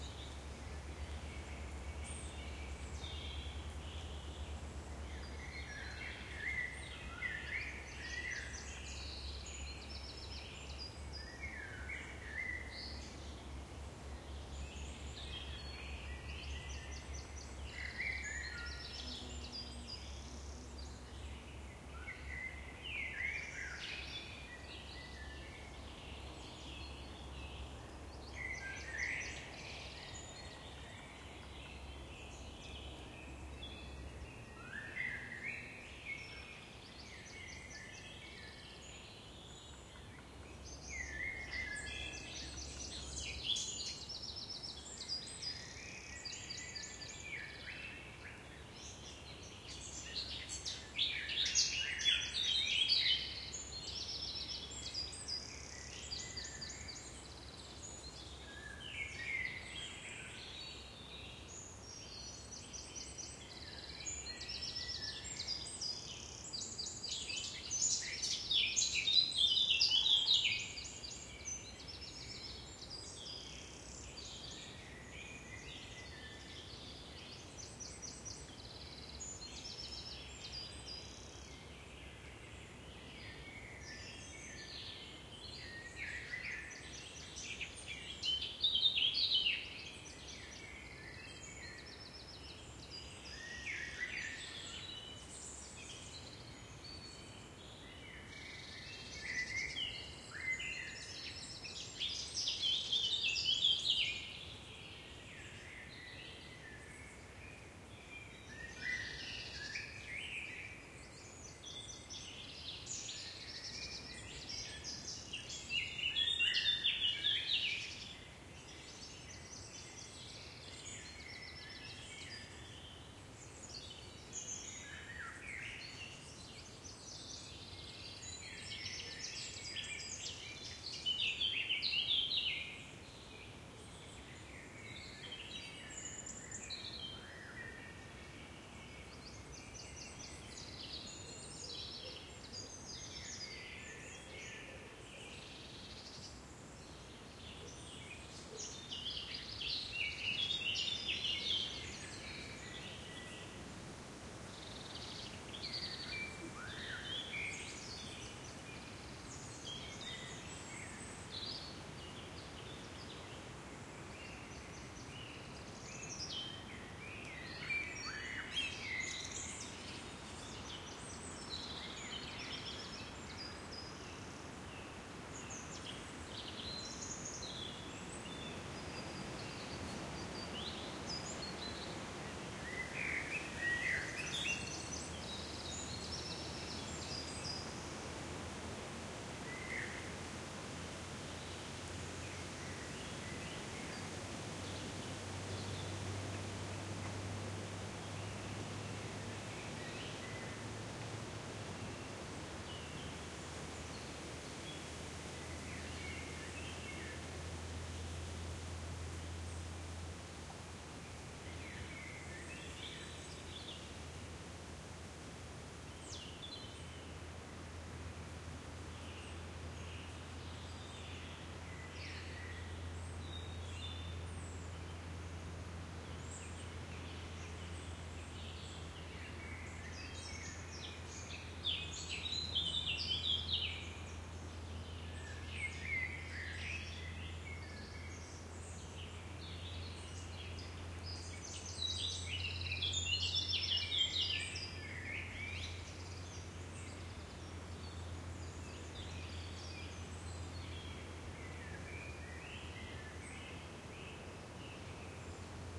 French Forest Springtime
Happy forest at the end of the day.
MixPre-3
2x Oktava MK012
ORTF
Birds, Nature, Peaceful, Field-recording, Forest